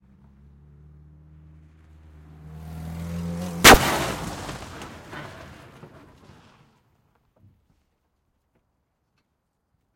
Car Crash M-S
Actual Mid-Side recording, of an actual Peugeot 406 hitting an actual Ford KA.
Exterior, M-S decoded to stereo.
Sehnheiser MKH418 > Sound Devices 788t